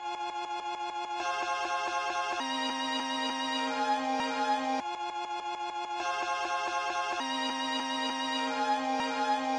sliced classic sample